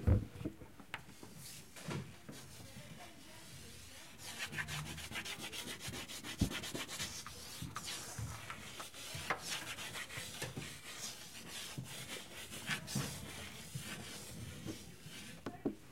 OM-FR-chalkonboard
Ecole Olivier Métra, Paris. Field recordings made within the school grounds. Someone draws on the blackboard.
France, school, recordings, Paris